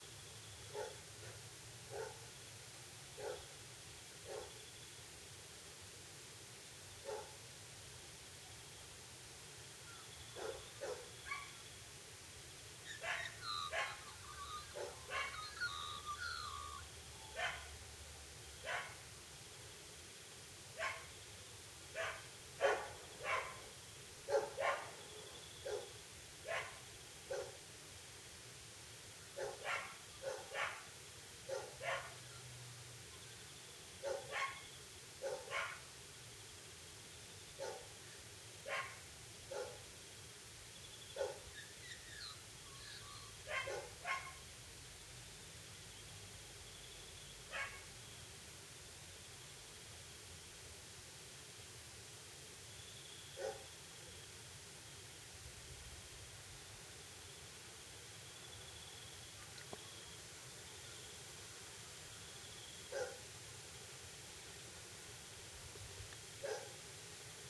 Dogs bark back
Two dogs bark at each other in the early morning.
bark, barking, birds, dog, dogs, early, morning